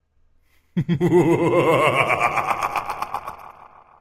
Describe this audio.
Maniacal Laugh 3 plus echo
Varying Maniacal Laughter
maniac, laugh, crazy